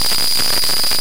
mandelbrot 0.1902+0.0311j imag
Experiments with noises Mandelbrot set generating function (z[n + 1] = z[n]^2 + c) modified to always converge by making absolute value stay below one by taking 1/z of the result if it's over 1.
additive, chaos-theory, mandelbrot, noise, synthesis